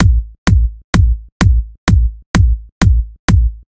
A looped kick drum, synthesized out of bazzism and processed in ableton live.